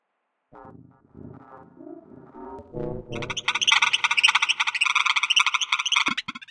sounds like zooanimals in space :)
dub, echo, effects, experimental, reaktor, sounddesign